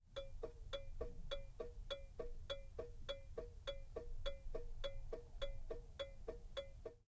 Car Turn-Signal Clanky-Metallic Plymouth-Acclaim
Clanky sound of turning signal in Plymouth Acclaim